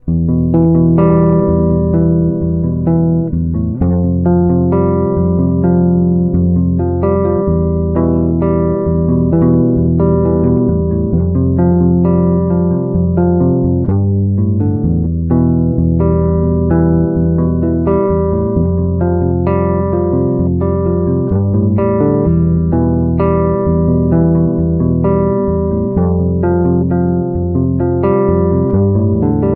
Guitar guitar guitar !